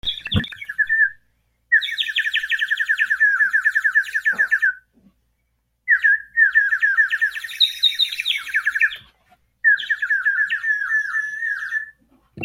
Twittering Bird Sound Effect
This is a bird effect I made using a bird whistle filled with water. Enjoy using it for any kind of use!!
noise, soundeffect, twitteringbird